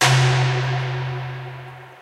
Neil Huxtable playing drums. edited by Tom (pumping up fundamental of each pitch) as an experiment - never going back to do the full chromatic set with full decay. there is an abrupt end to these samples - you might want to play around with your sampler's ADSR envelope. enjoy!